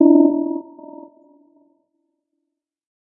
Modulated tone pulse with a bit of diffuse echo, inspired by request for "alien beacon" from start of "Independence Day: Resurgence". This is not intended to exactly replicate that sound. Created mathematically in Cool Edit Pro.
beep, ping, sci-fi, synthetic